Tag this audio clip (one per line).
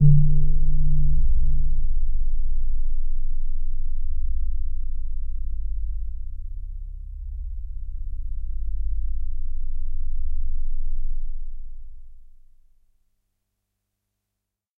synth
bellpad
pad
electronic
bell
multi-sample
waldorf